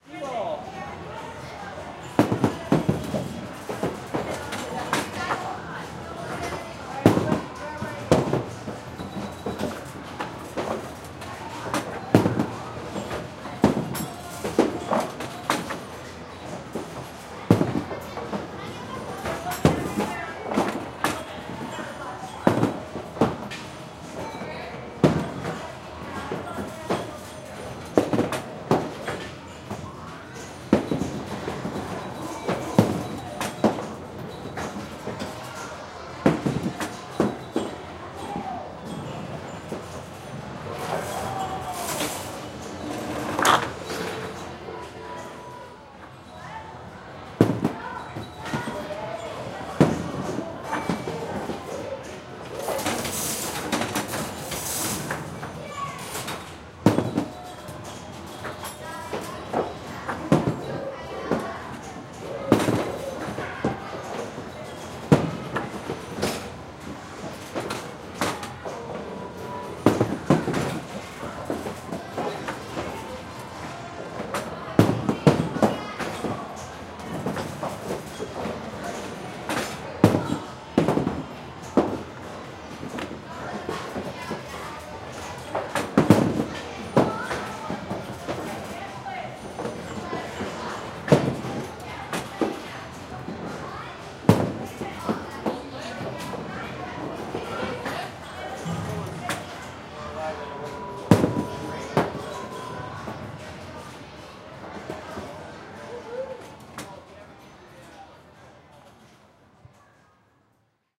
Skeeball played at the Silver Ball Museum, Asbury Park, New Jersey, Jersey Shore, USA
Sony PCM-D50